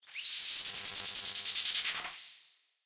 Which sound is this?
Electronic pulses sound like little bursts of air or brushing - slight phase and volume rise and fall - Generated with Sound Forge 7 FM Synth
synth, fm, electronic, pulses, effect